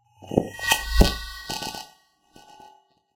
Noise reduction-like timbre with an initial attack and two sets of processed echoes. Reversed version of "Response and Call 1"